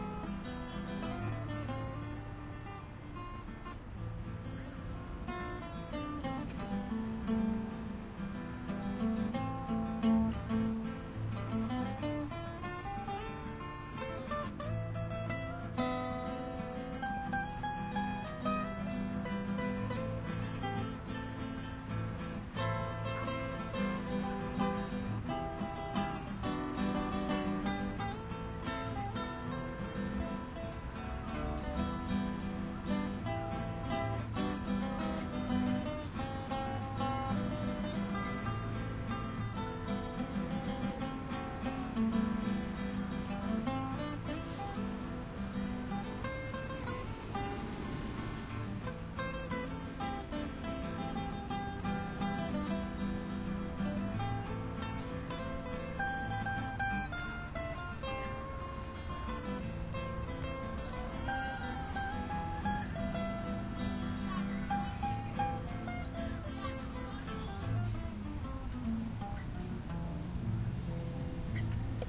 Day Guitar In The Park
Field recording of two people playing guitar at a park during the day.